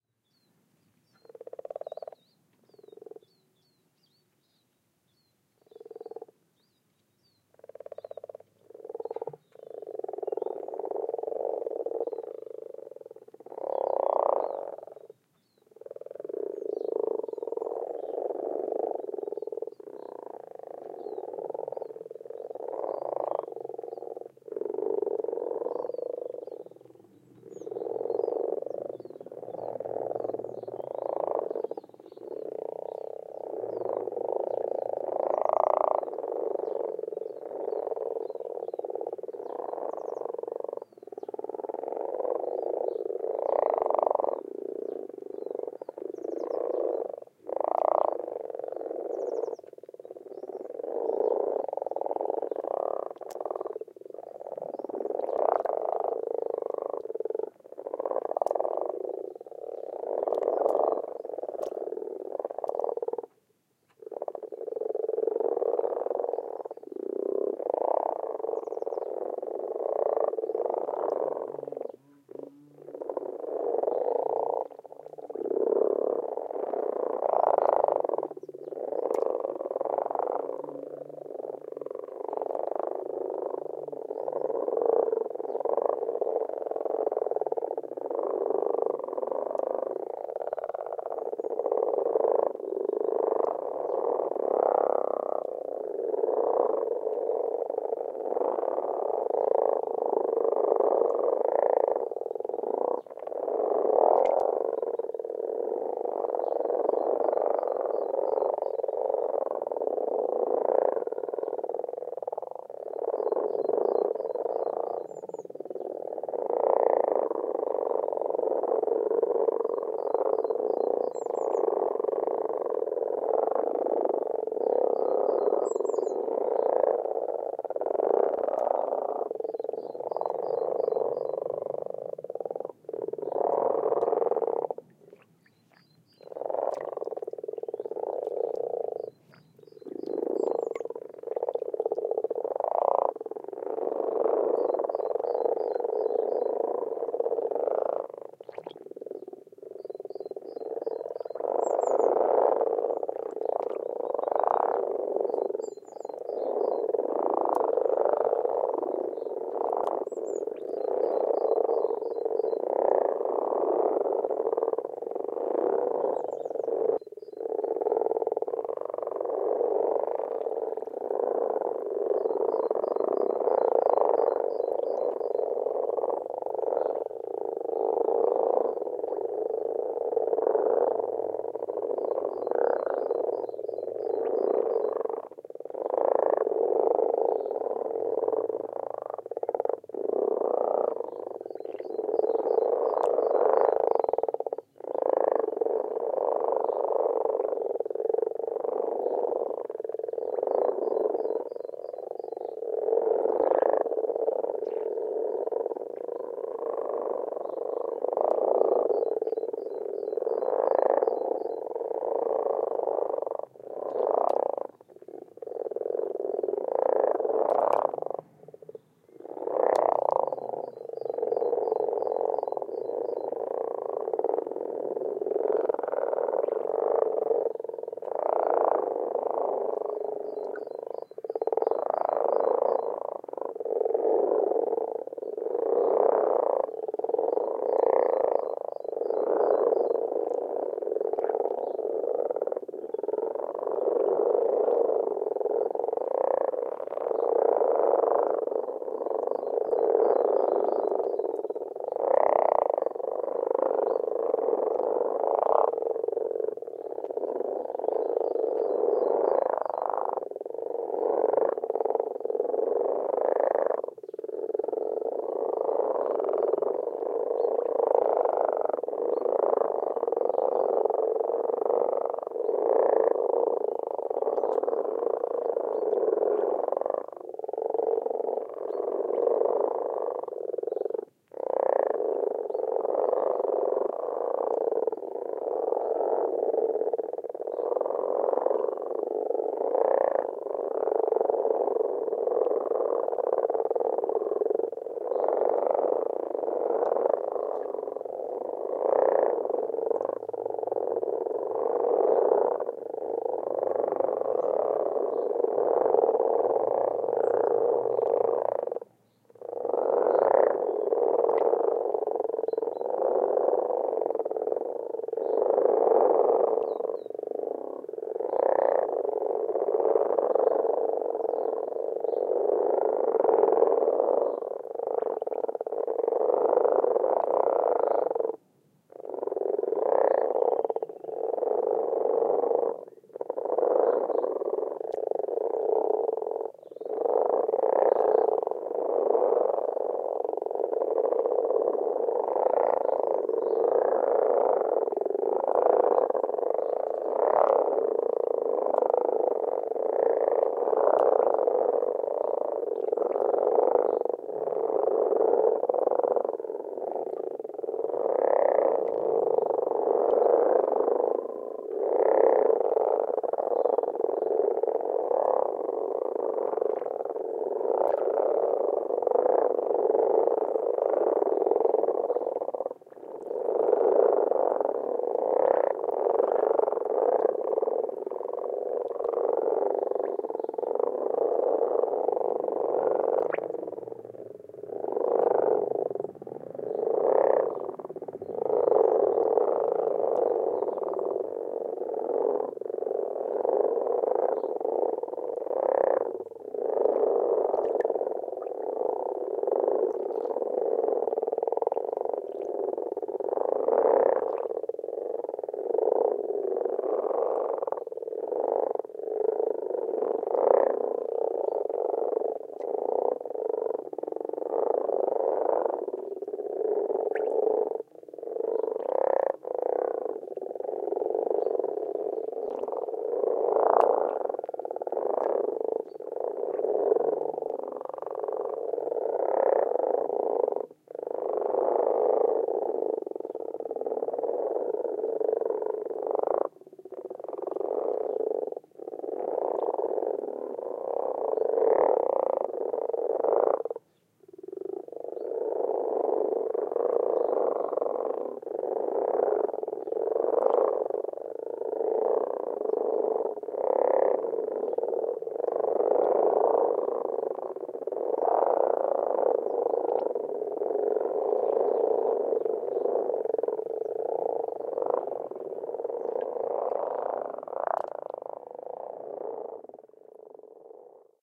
Frogs Croaking 4
A stereo field-recording of many frogs (Rana temporaria) croaking at the beginning of the mating season.Filtered below 100 Hz due to windy conditions. Rode NT4 with Dead Kitten > FEL battery pre-amp > Zoom H2 line in.
croak, croaking, field-recording, frogs, plop, pond, rana-temporaria, stereo, xy